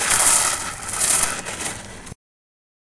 'Listen for the smallest differences possible to perceive.' Pauline Oliveros' dictum is a process of undisciplining the ear. To decolonize the ear, as it were. expand the category of what constitutes 'music' beyond the sphere of the nominally 'musical.' politicize the ear and the field the ear resides in, situate listening as an historical achievement, think the 'field' of 'field recording' as a hierarchically structured space of domination and exploitation. and yet. and yet, here, we can here the openings to other possible worlds. electronic music is speculative acoustics: re-route narratives that bind music to one particular harmonic and melodic order outside and beyond itself.
Tiny Ass Pebbles. There is a small field of extremely small pebbles behind the stage in the Porter Quad at UCSC. This recording was made by playing with this field. It almost sounds granular. Tascam dr100, omnidirectional mode.
sampling, ambience